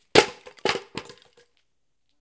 Throwing a piece of wood onto a concrete patio.